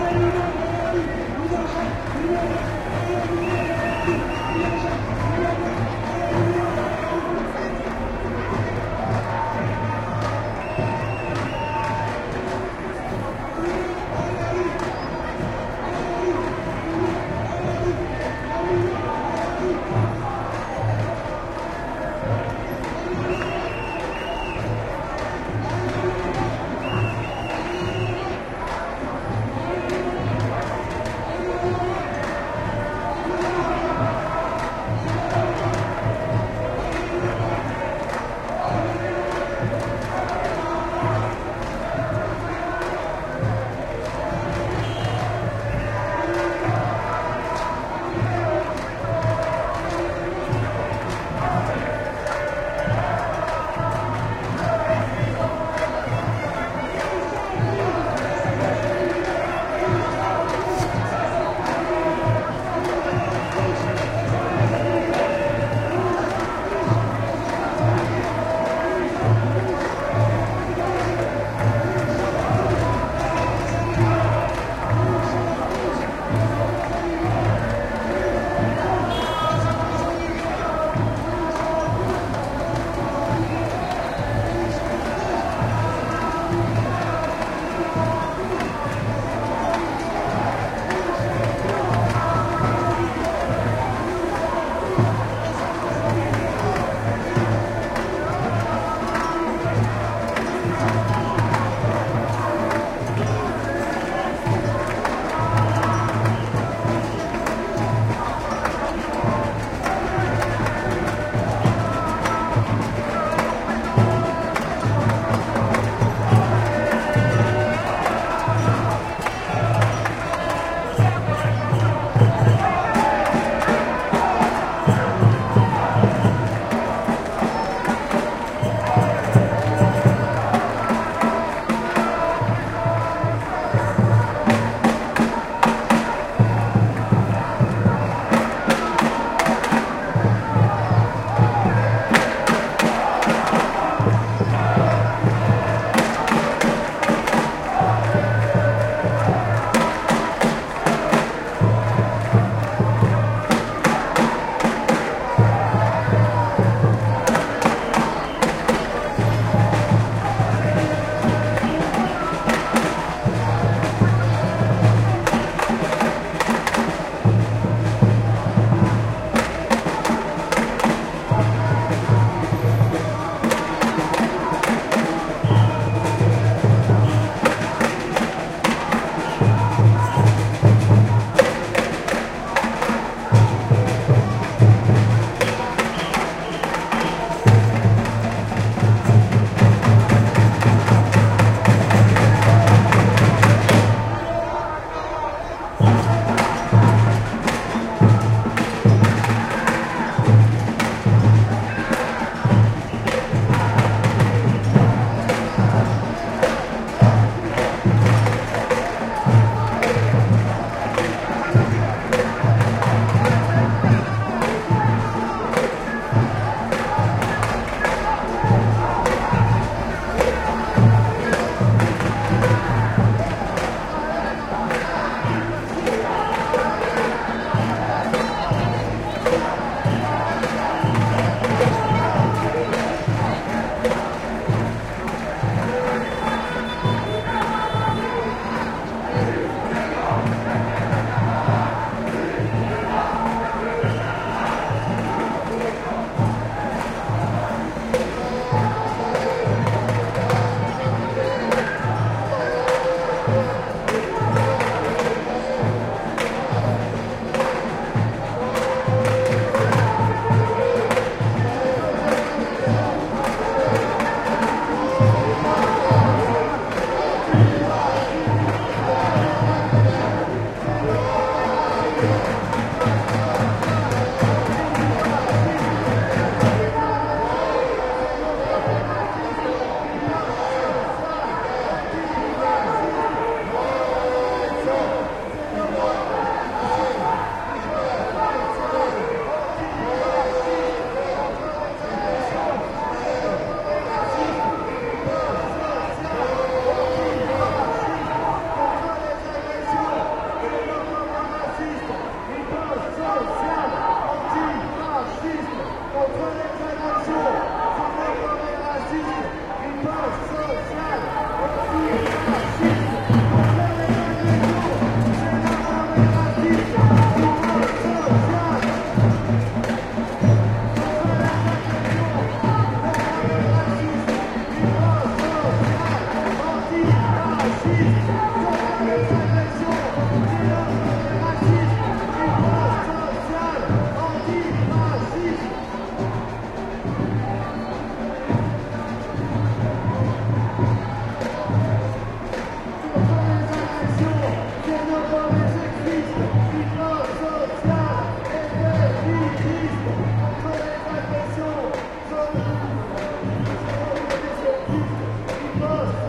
2015 04 21 Manifestation Sans Papiers Bd Magenta fenêtre rue
Manifestation de sans-papiers à Paris, Bd Magenta, le 21 mars 2015, 17h, pris depuis une fenêtre donnant sur le boulevard au 6ème étage.
Demonstration in Paris, France, Bd Magenta, near Gare du Nord. Recorded with Edirol R-09HR from a window located at the 7th floor over the boulevard.
ambience, demonstration, field-recording, france, paris, people, protest, sans-papiers, street